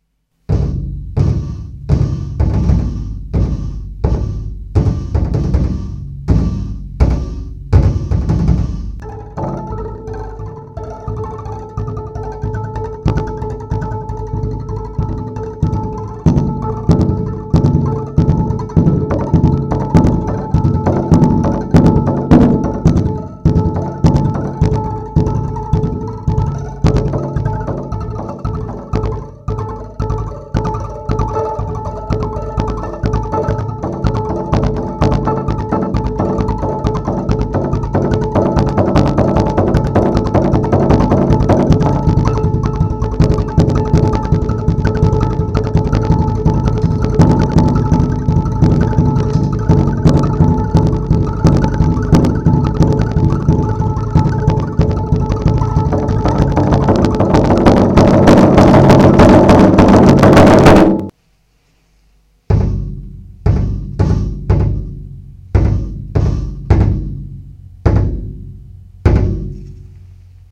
Stress. Your pulse is rising.
Modified 15" drum and modified drumsticks. Capacitance mic. Creative Soundblast PCI Platinum.